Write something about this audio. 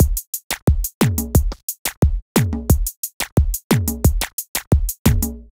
Wheaky 2 - 89BPM
A wheaky drum loop perfect for modern zouk music. Made with FL Studio (89 BPM).
drum, zouk